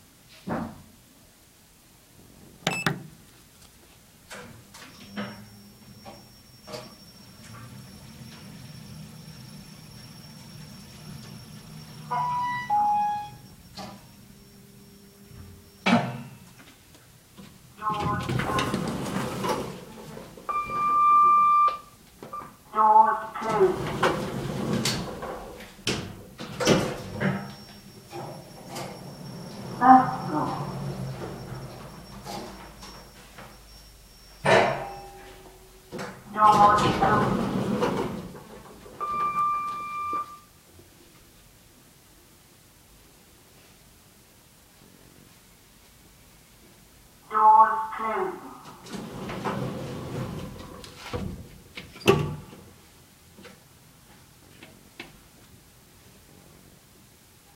Lift6- down to first floor
Lift ride from the second floor down to the first. Includes me calling the lift, chimes, announcements and the lift in motion.
announcement, ding-dong, elevator, kone, opening